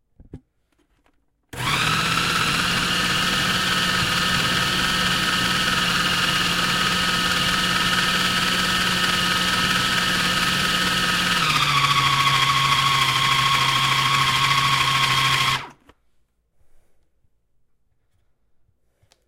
Chopping frozen blueberries with food processor on high
food-processor, kitchen, field-recording